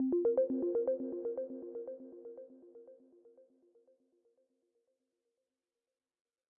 alert1 : (no distortion)
Ascending synth jingle, usefull as an alert in your game or app.